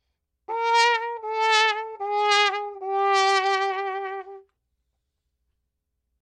Wah Wah Wah Wah on Trumpet